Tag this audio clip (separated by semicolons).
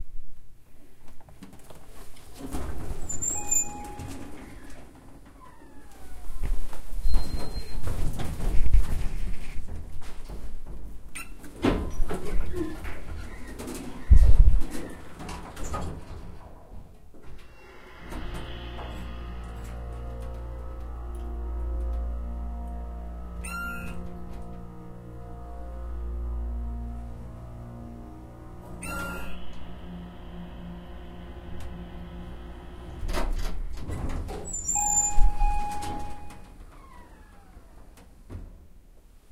city; dings; open; elevator; beeps; machine; field-recording; ding; close; beep; up